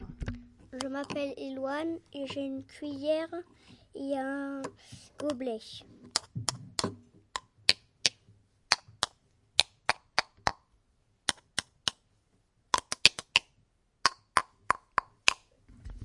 Elouan-cuillère et gobelet
spoon and glass